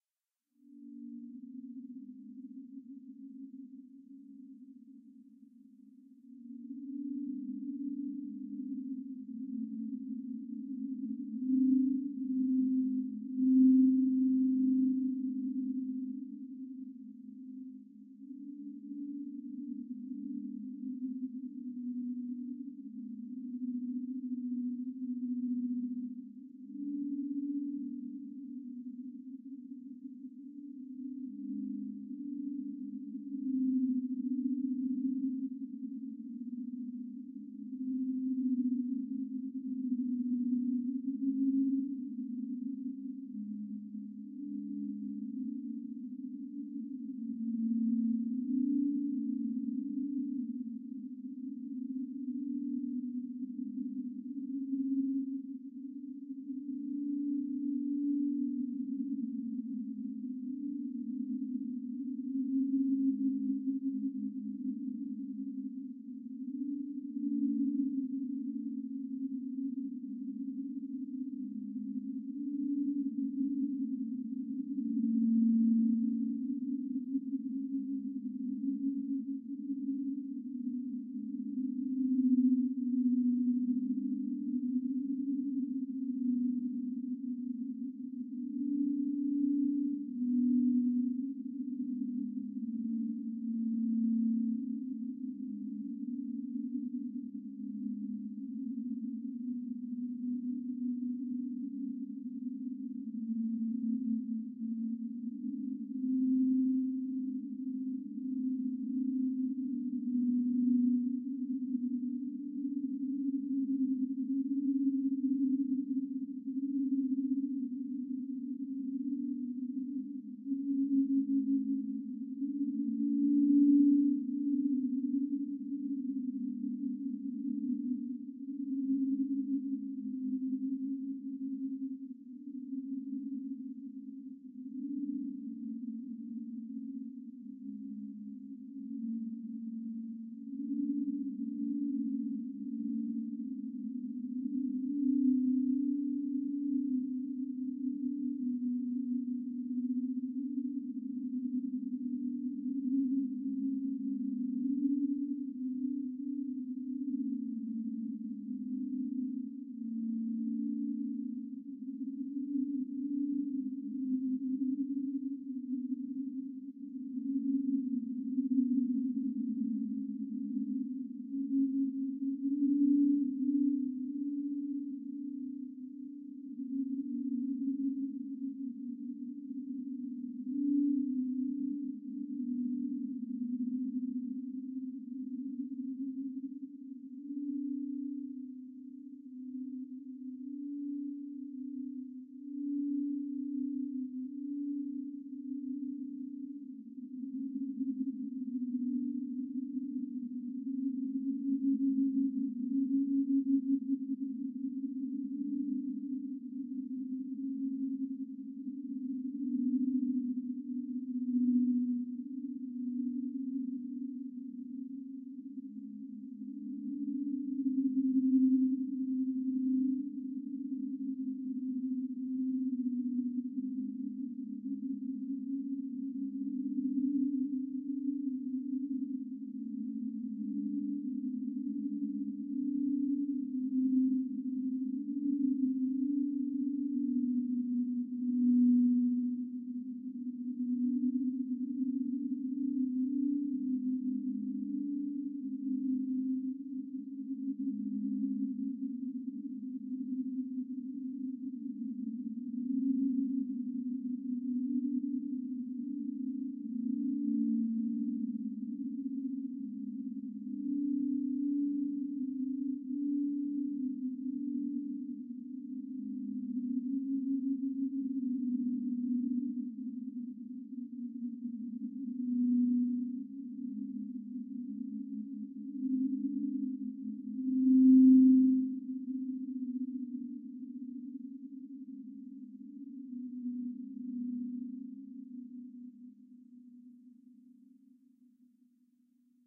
Spacesound for the quit hour.